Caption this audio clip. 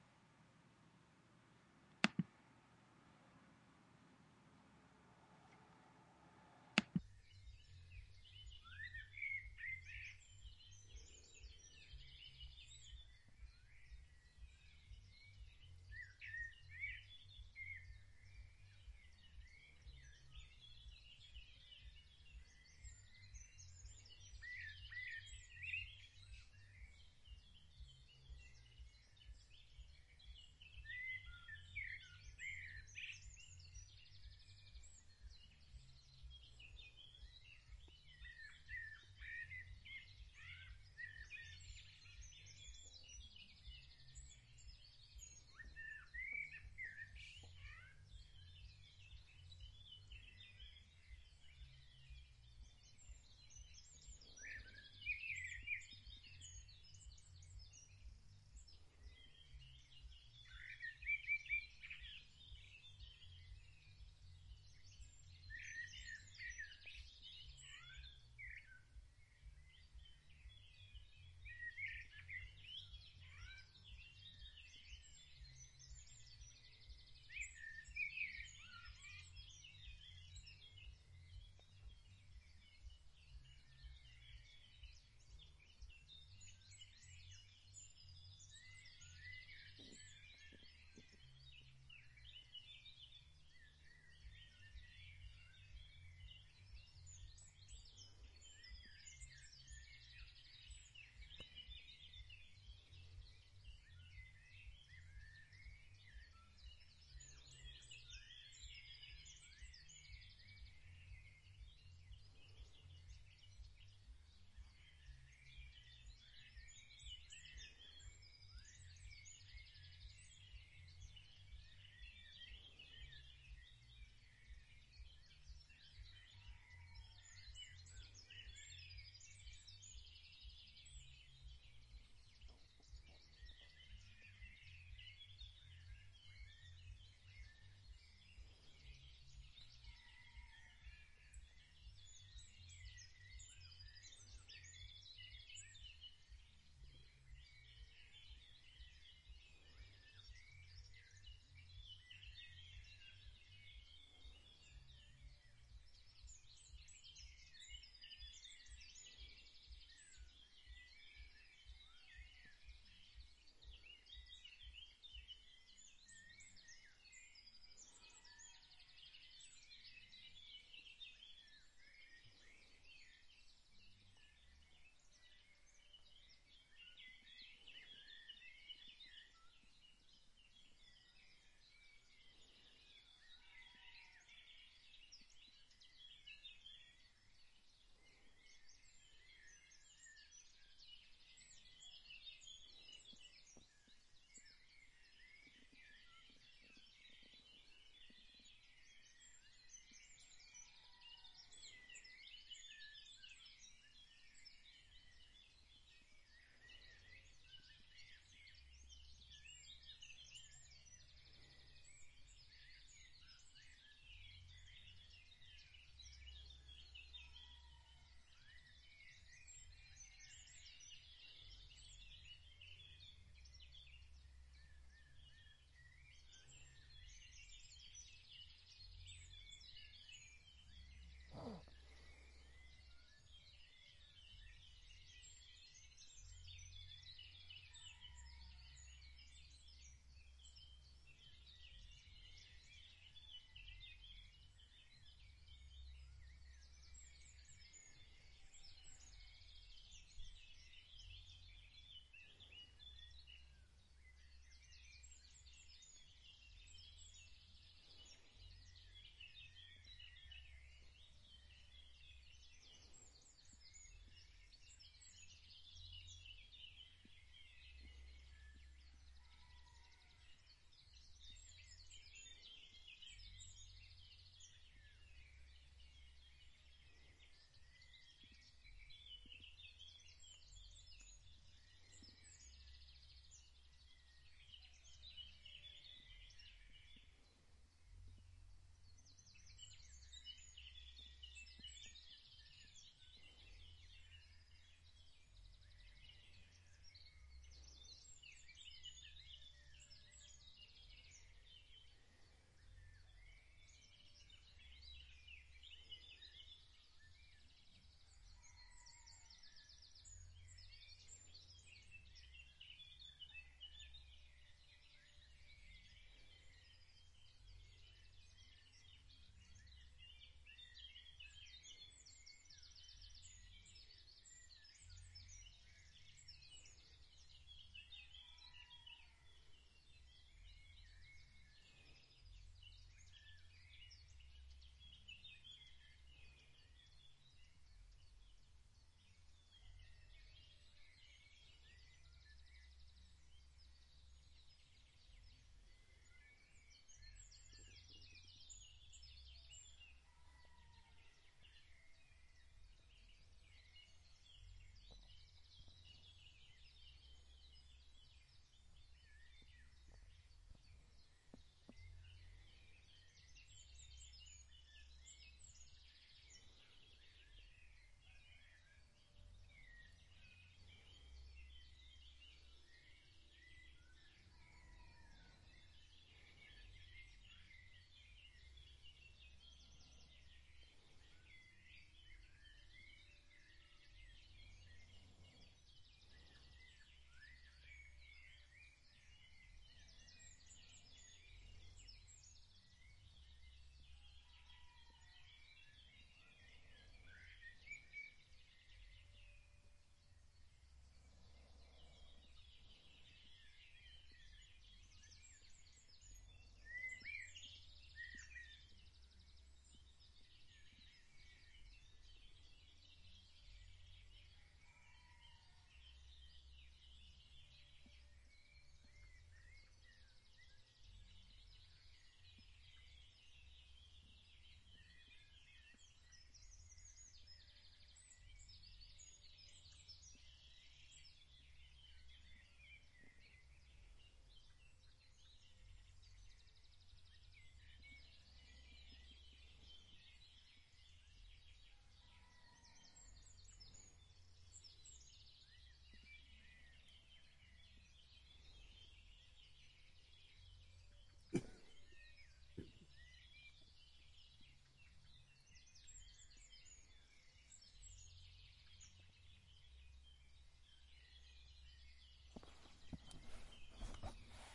Birds, Robin, Rooster, Finches, farm ambience,
Good, rich layering of bird sounds recorded at 5 am from a campsite in St Aquiline DeCorbion in western France. July 2016 TascamDR 05.